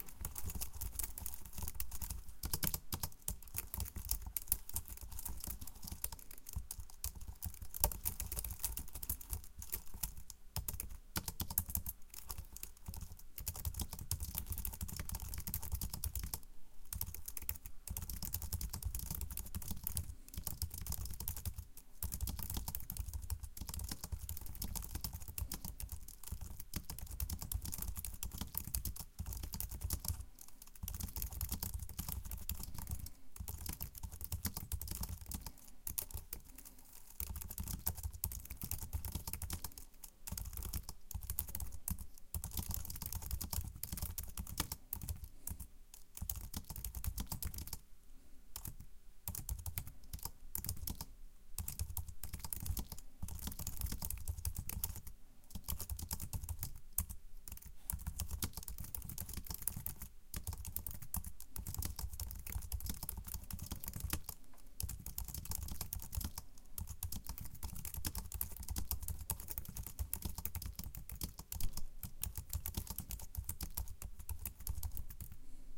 computer keyboard typing
writer, keystroke, key, type, hacking, laptop, business, computer, office, typing, keys, clicking, keyboard